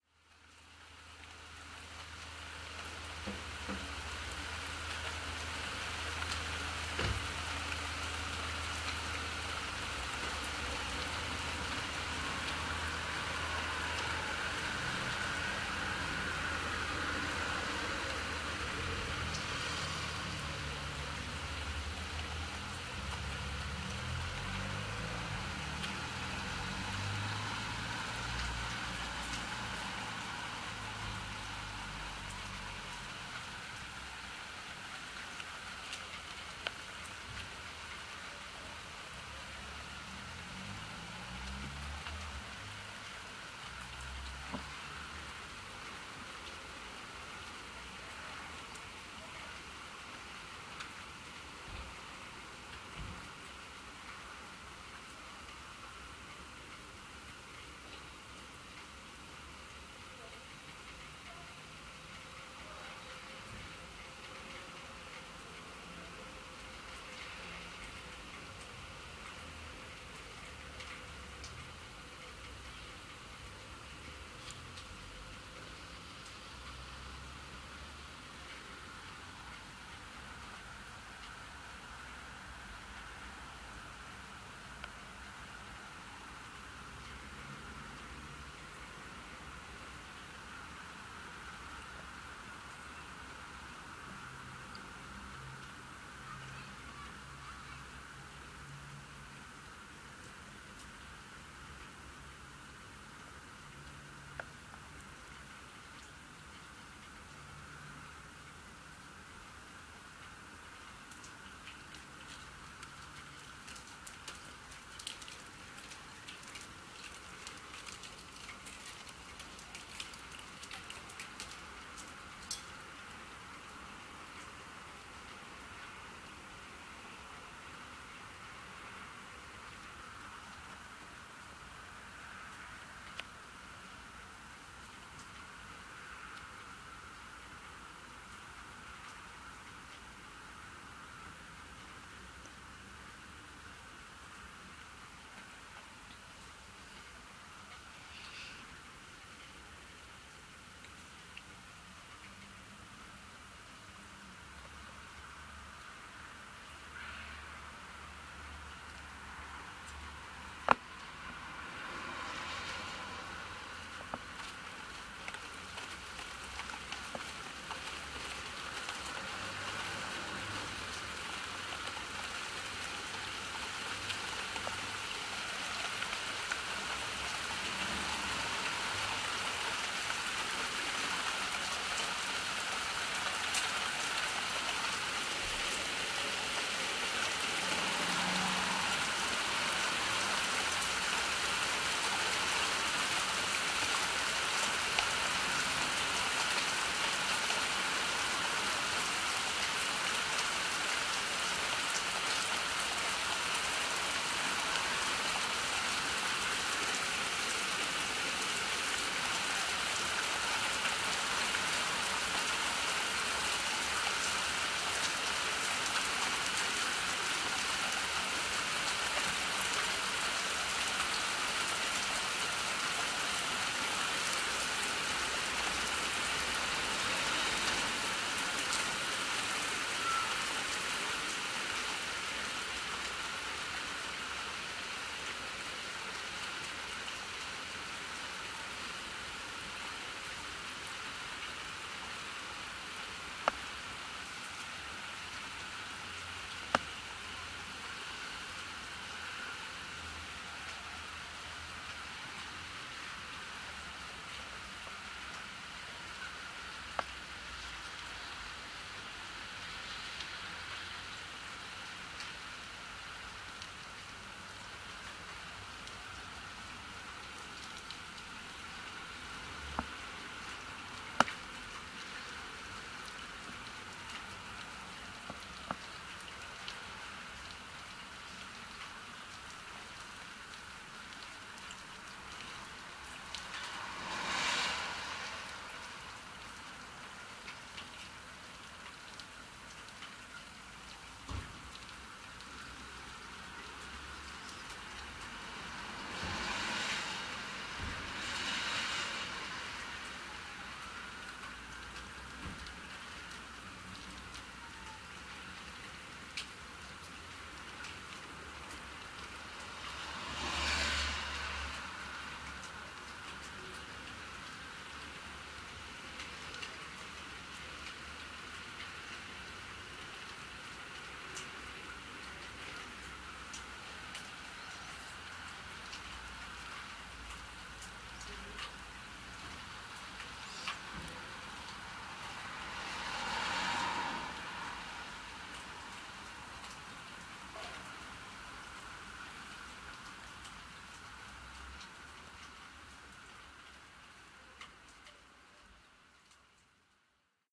Rainy Day
The unpredictable British weather at its finest. Starts off with a little bit of rain, stops for a little and then gets heavier again. There's even a little bit of hail too! And this is all in less than six minutes.
field-recording, rainstorm, rainy, Britain, unpredictable, weather, hailstones, hailstone, hail, shower, raining, storm, hailing, rain